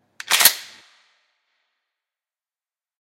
Rem870 Slide Forward 2
A Remington 870's pump being driven forward.
Shotgun, Gun, Shotgun-pump